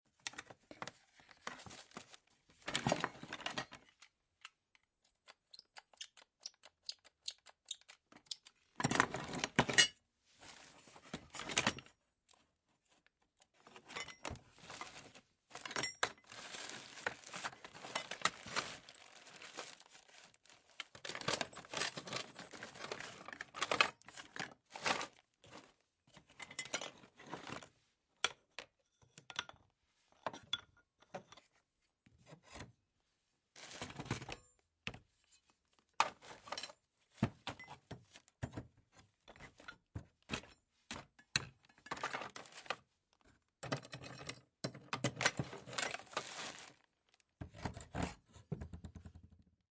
Recoreded with Zoom H6 XY Mic. Edited in Pro Tools.
Person opens a case with various stuff in it, searching for something.
box, case, collision, metal, multiple, scavenging, searching, tool, wooden